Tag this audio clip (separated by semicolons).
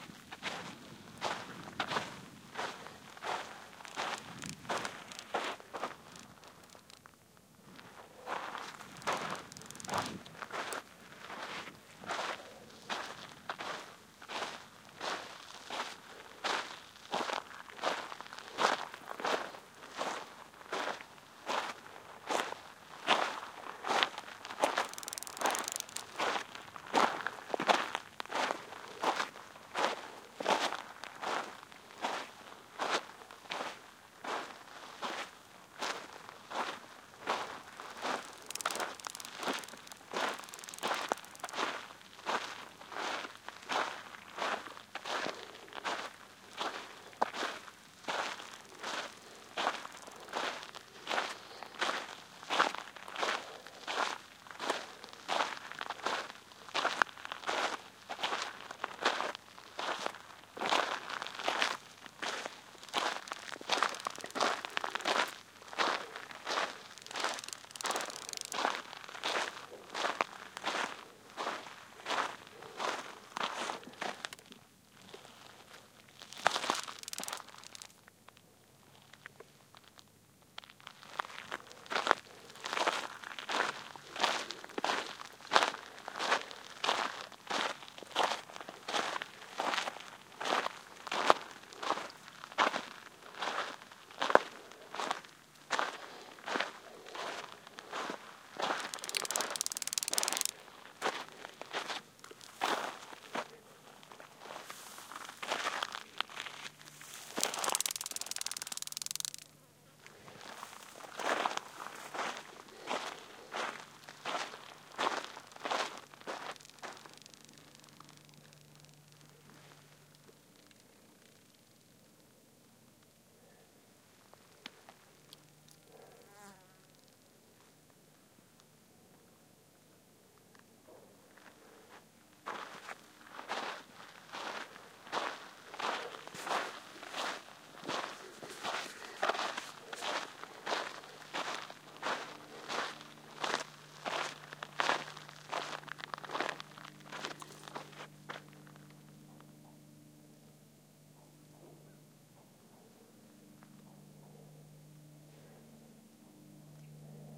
High-Desert; Crunch; Walking; Crunching; Arizona; Footsteps; Solitude